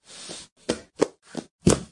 Wooden Floor banging
Supposed to be hands dragging on floor but kinda sounds like someone fell down the stairs.
drag, bang, smack, wood